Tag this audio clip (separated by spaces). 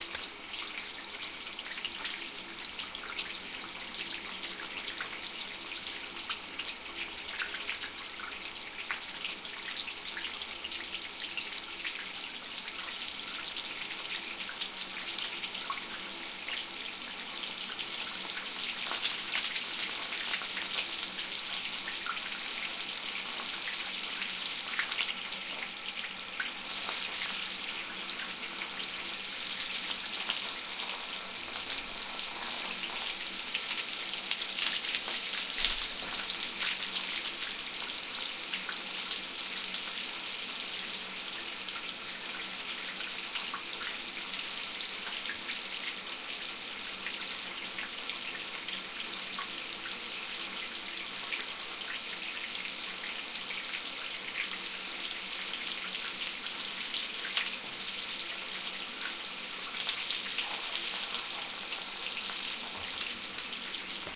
bath bathroom Shower sink water